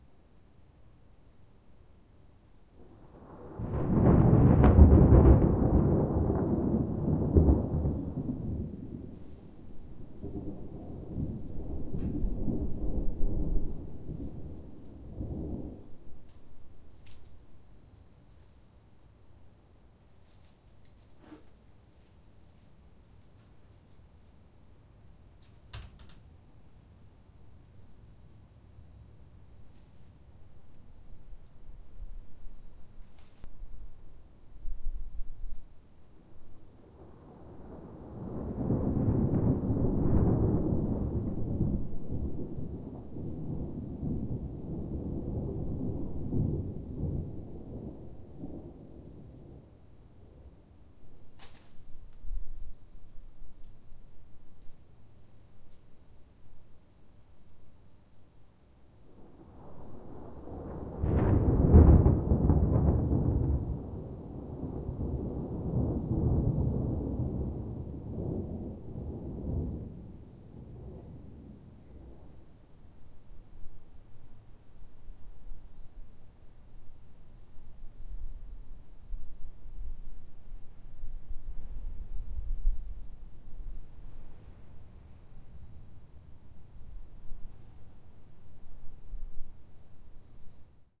A couple of thunder claps and rumbles recorded around midsummer in London, at about 5 AM.
nature, thunder, thunderclap